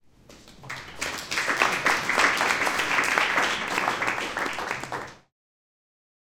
Hands Clapping
Applause between speakers at the first Berlin Video meetup event.
Recorded with a Zoom H2. Edited with Audacity.
Plaintext:
HTML:
applauding, applause, approval, audience, berlin, betahaus, cheer, clapping, group, positive, reaction, speaker